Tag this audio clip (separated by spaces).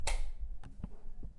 click ambient swith